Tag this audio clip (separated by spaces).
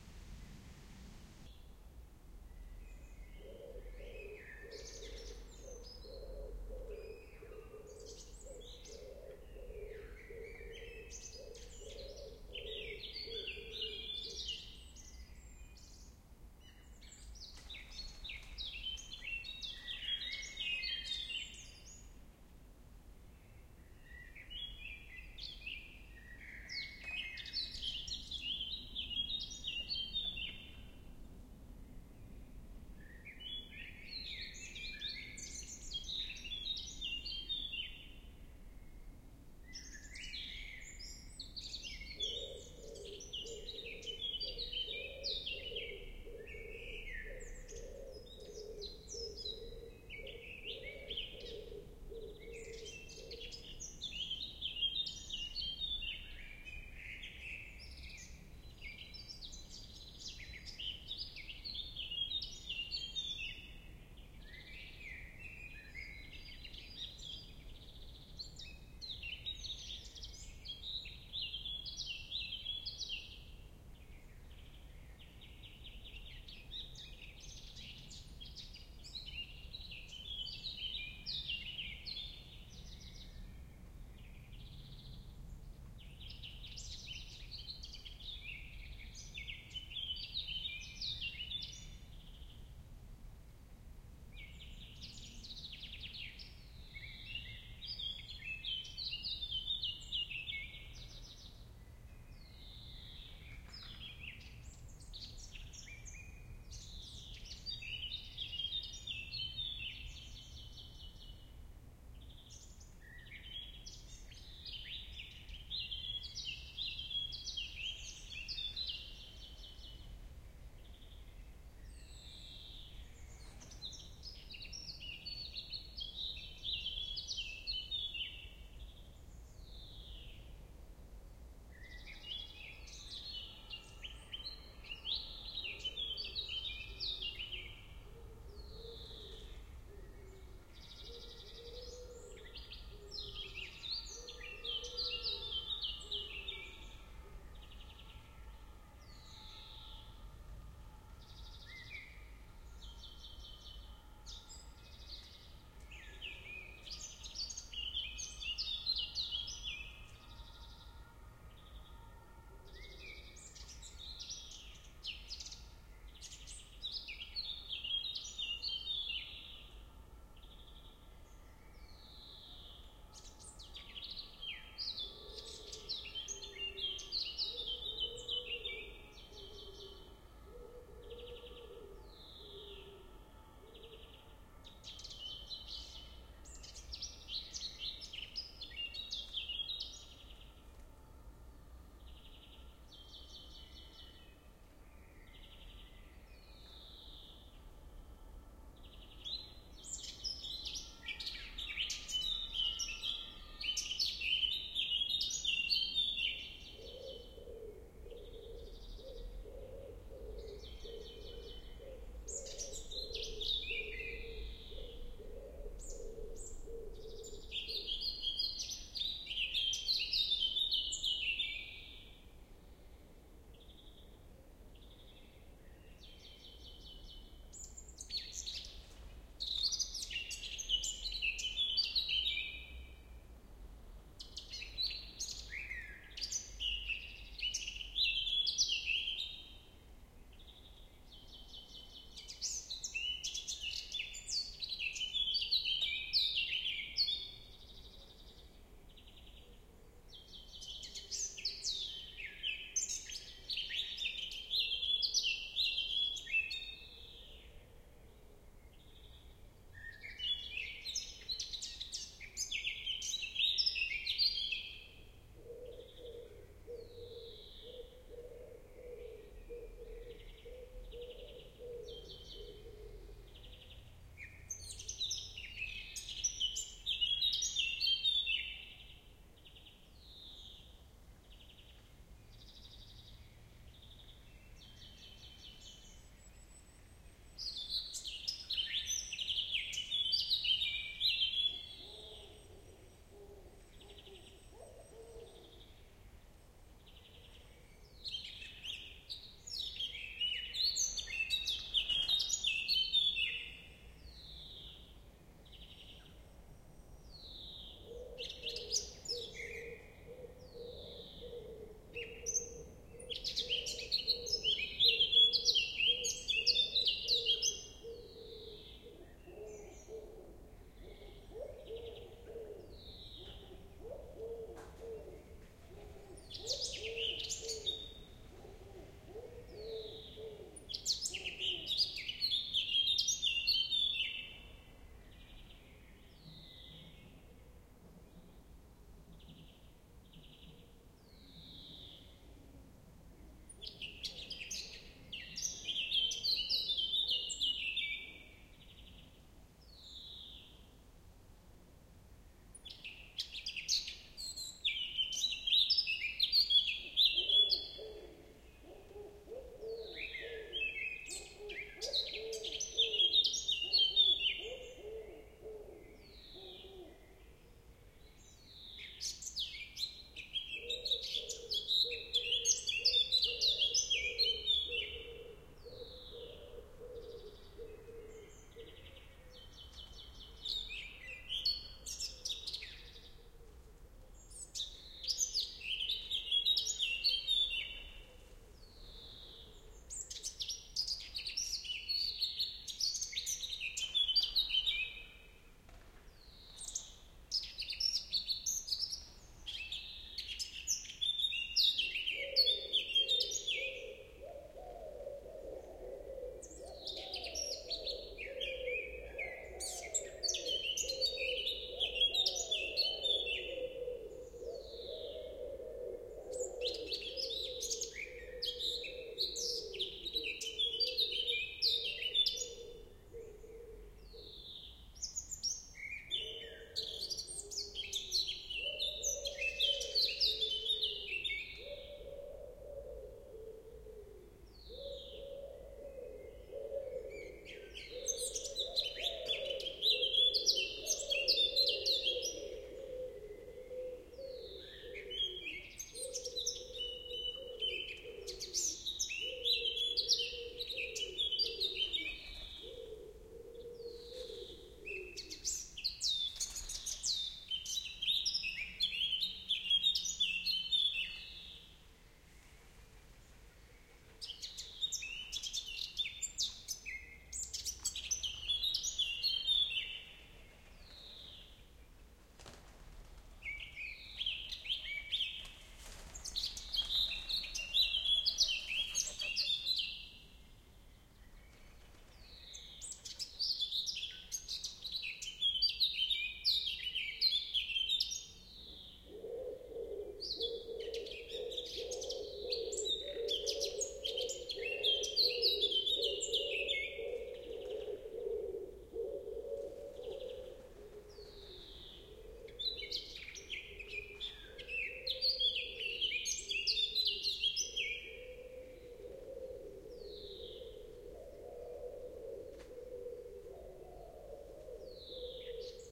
flickr blackcap wind field-recording birdsong